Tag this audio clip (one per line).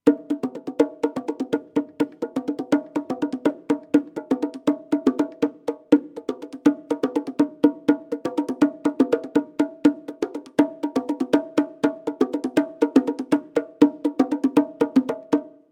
environmental-sounds-research
percussion
drum
bongo